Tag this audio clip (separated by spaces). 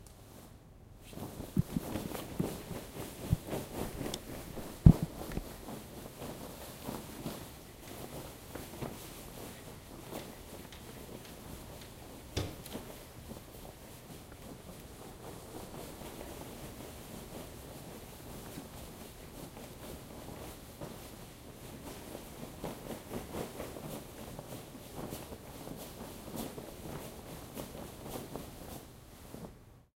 dress material movement running satin swoosh